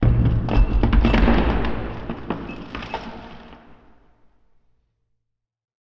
building collapse04 enclosed space
made by recording emptying a box of usb cables and various computer spares/screws onto the floor then slowing down.. added bit of reverb
building, collapse, rubble